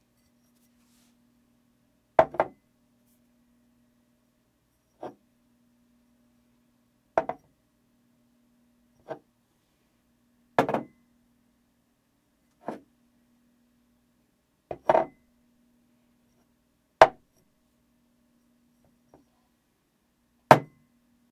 Setting a Glass down